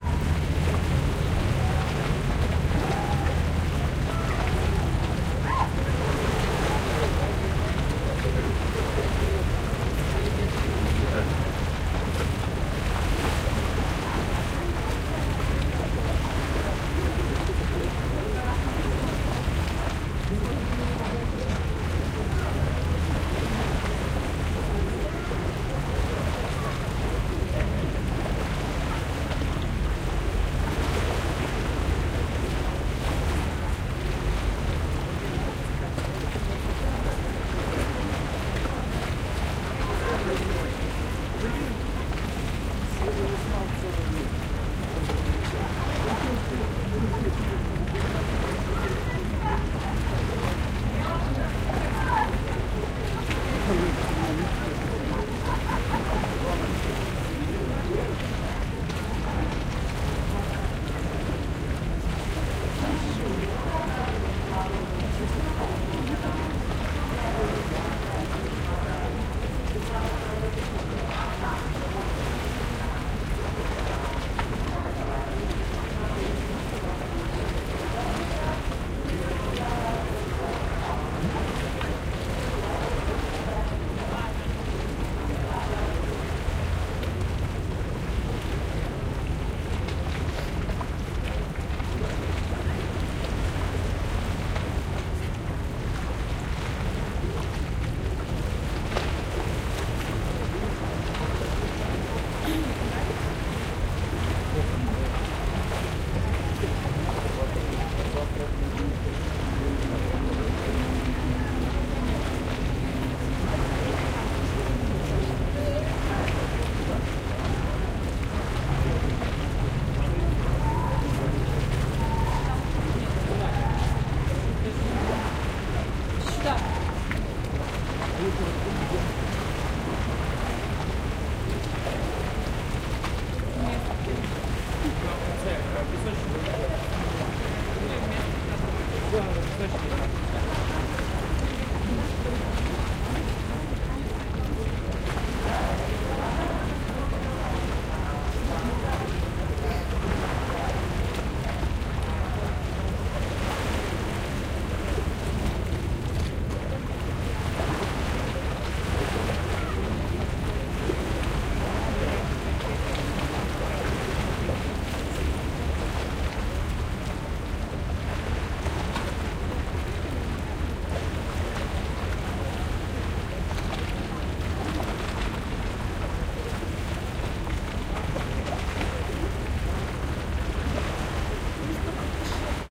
ambience, St Petersburg, bank of Neva, Peter and Paul fortress, small crowd, water lapping
ambience, field-recording, St-Peterburg